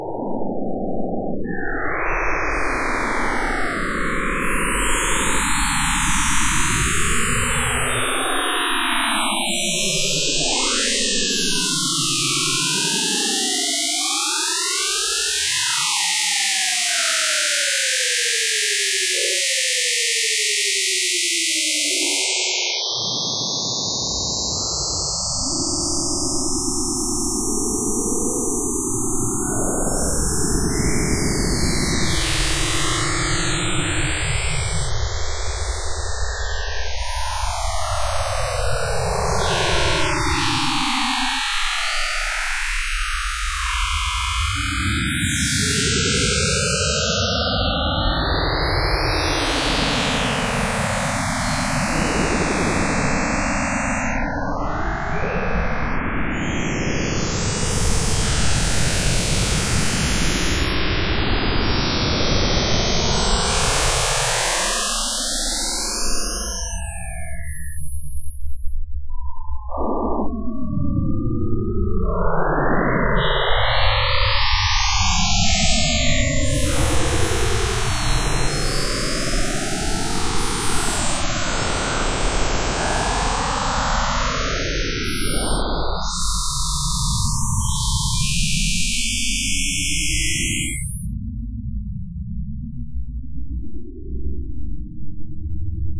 Warp Speed
noise, black-hole, scifi, space-ship, star-wars, space-travel, space, warp-drive